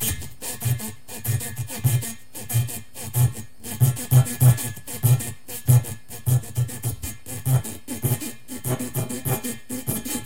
rubber sample
thats a piece of a recording i did rubbing a rubber bar/stick on the
metallic little table of my sitting room. i will be adding more cuts of
the recording, and the full recording as well, in this pack called "rub
beat", soon...